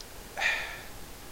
The sound someone makes after take'n a drink of delicious milk
It's an expression... Like the combination of ah and eh...
Expressive, Noise, Satisfied